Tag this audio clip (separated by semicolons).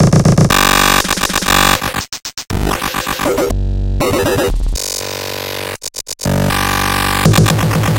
virus; bit; error; drum; Glitch; robot; space; android; machine; rgb; robotic; artificial; art; databending; console; failure; spaceship; experiment; computer; system; droid; game; cyborg; command